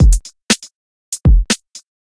This Is A Minimal Pop/Dance Beat At 120 bpm.
break, dance, house, 120-bpm, electro, club, pop